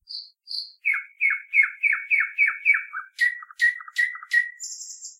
Bird, Thrush Nightingale 02
Little bird (Thrush Nightingale) tweets (background noise filtered out!).
This sound can for example be used in films, games - you name it!
If you enjoyed the sound, please STAR, COMMENT, SPREAD THE WORD!🗣 It really helps!